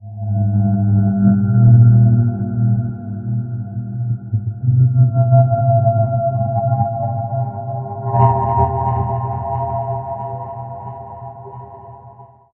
Samurai Jugular - 23
A samurai at your jugular! Weird sound effects I made that you can have, too.
dilation, sound, spacey, trippy, experimental, effect, sci-fi, time, sfx, sweetener, high-pitched